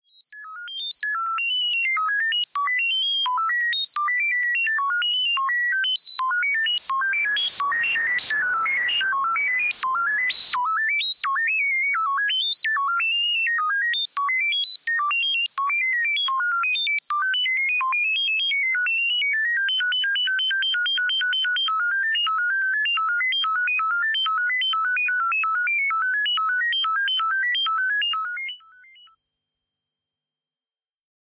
R2D2 sound alike made with Arp Odyssey analog synht.
fx, r2d2, sci-fi